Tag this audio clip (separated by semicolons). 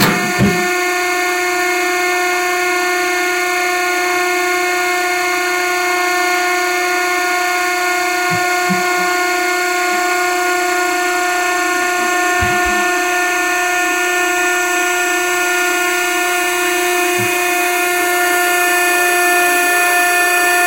Compressor; Science